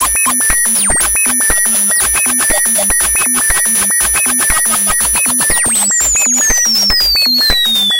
Aerobic Loop -19
A four bar four on the floor electronic drumloop at 120 BPM created with the Aerobic ensemble within Reaktor 5 from Native Instruments. A bit more experimental but very electro and noisy. Normalised and mastered using several plugins within Cubase SX.